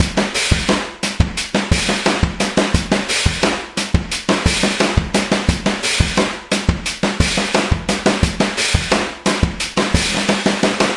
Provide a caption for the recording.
DL BA018 175
Processed Drumloop with bitcrusher / Low-Fi effect (175 bpm)
175, acoustic, beat, bitcrusher, bpm, drum, drumloop, lofi